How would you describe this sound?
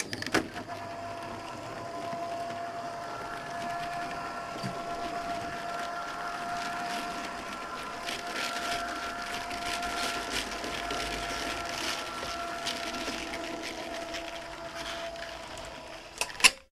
An old electric roller shutter closing. This is a small PVC shutter that is installed at a French window door at my house. The shutter has about 2.1m high by 1.2m wide (the size of the window). You can hear the relay controlling the motor at the beginning and at the end.